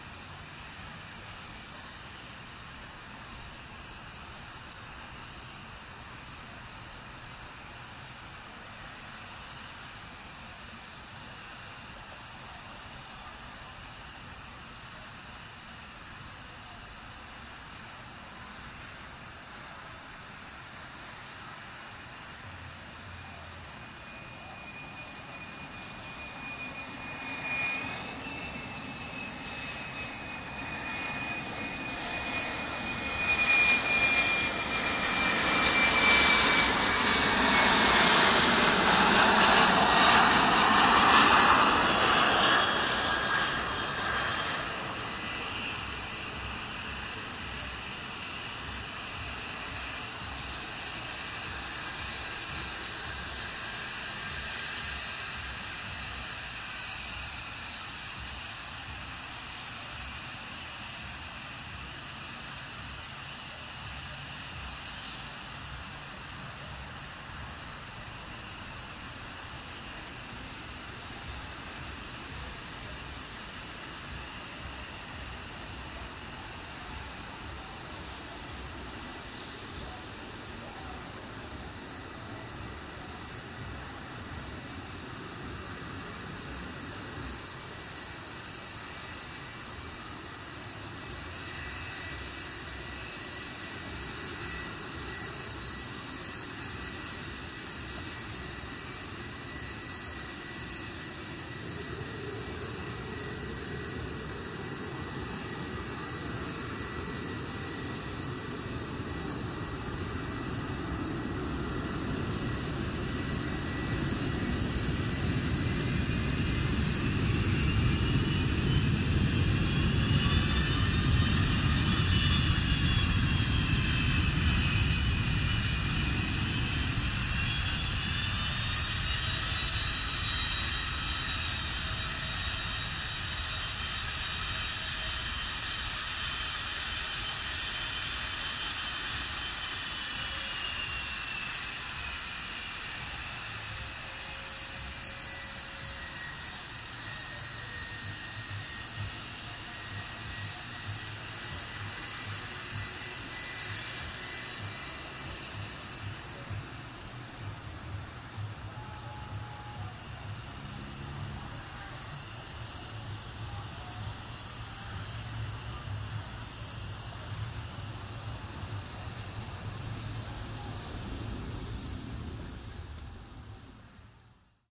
airport4quiet
Jets taking off and landing at PBI recorded with DS-40 and edited in Wavosaur.
airplane, airport, jet, plane